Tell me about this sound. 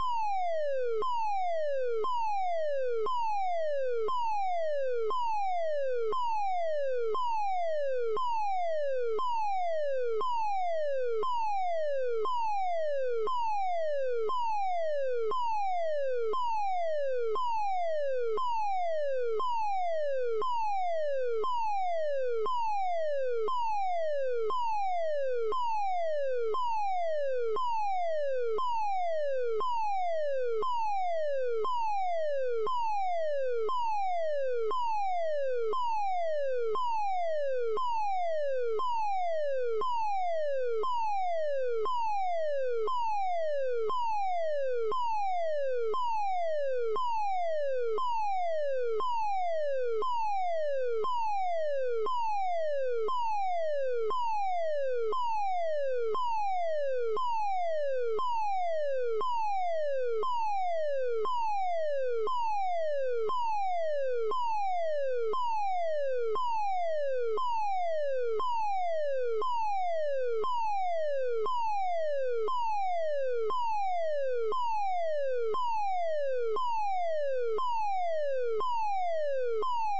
Edited with OpenMPT
In certain Countries, especially in Germany this is actually very close to the real fire alarm. Please do not abuse it!
disaster, federal, siren, defense, raid, emergency, Burglar, outdoor, alert, tsunami, modulator, hurricane, alarm, honolulu, civil, hawaii, warning, Fire, tornado